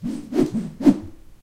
Quadruple Whoosh!!!
I use a bamboo stick to generate some wind *swash* sounds. I used following bamboo stick:
Find more similar sounds in the bamboo stick swosh, whoosh, whosh, swhoosh... sounds pack.
This recording was made with a Zoom H2.
air, attack, bamboo, cut, domain, flup, h2, luft, public, punch, stick, swash, swhish, swing, swish, swoosh, swosh, weapon, whip, whoosh, wind, wisch, wish, woosh, zoom, zoom-h2